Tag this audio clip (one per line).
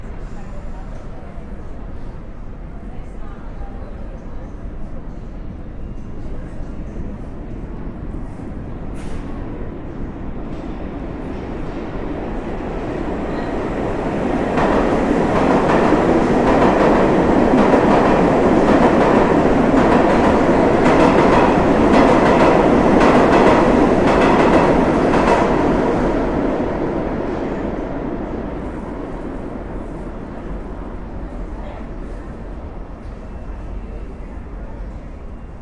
subway
train
field-recording
nyc
new-york
city
passing
underground